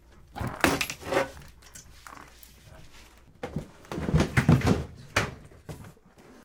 moving junk debris to open blocked apartment back door wood
junk, moving